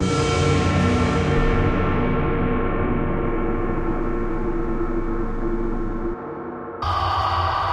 ambient 0001 1-Audio-Bunt 15
ambient, breakcore, bunt, digital, DNB, drill, electronic, glitch, harsh, lesson, lo-fi, loop, noise, NoizDumpster, rekombinacje, space, square-wave, synthesized, synth-percussion, tracker, VST